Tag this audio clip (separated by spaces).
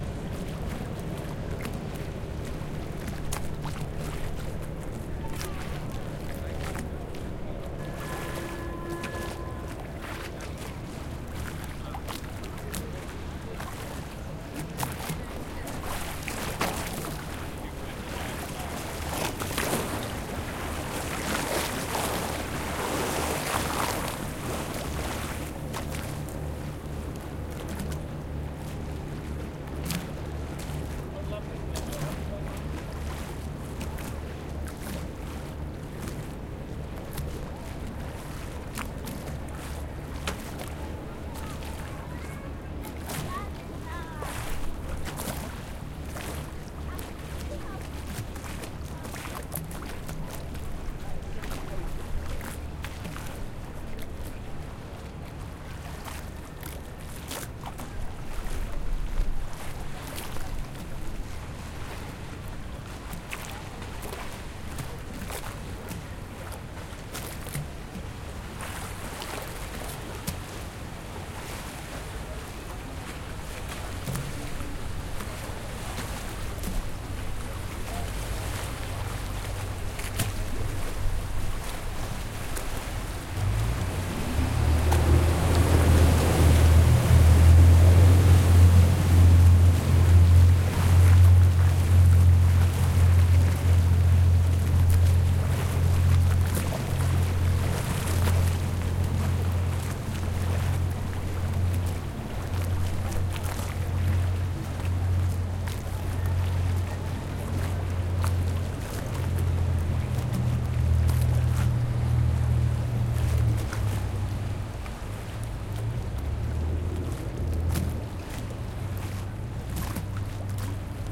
ambient city field-recording